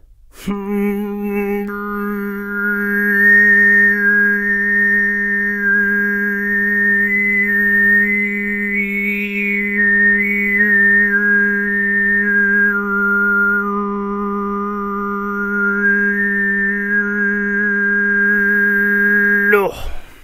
alfonso high 09
From a recording batch done in the MTG studios: Alfonso Perez visited tuva a time ago and learnt both the low and high "tuva' style singing. Here he demonstrates the high + overtone singing referred to as sygyt.
throat, tuva, singing, overtones, sygyt, high